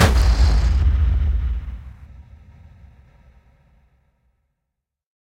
This is a mix of deep boom sounds I mixed together. Probably like 30 or more sounds to get just what I wanted, even my own voice mixed in there somewhere. Figured others might find this useful.
Might as well say what I used this for. So in making a big huge video for my family, where I've filmed them doing certain things and not tell them why, to which I'll present the video when we all get together for Christmas eve, 2019. Theres also a part where I hosted a picnic, filmed everyone, and then asked them to karate chop or kick towards the camera. The next day I went with a crew and filmed ninjas around the park. Edited together with the family attacking the ninjas then the ninjas falling back and exploding with a particle effect on After Effects. This is the main sound heard when that happens. I made a few others using this base sound.
While I'm bad myself at leaving comments, comments are always welcome to hear what someone used the sound for. Thanks.